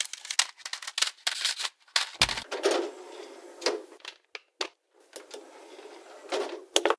disc from case to cd player and press play
Taking CD out of it's jewel case, then put it into CD player, close the tray and press play. Recorded with Olympus LS 10.
compact, CD, jewel, case, handling, disc, player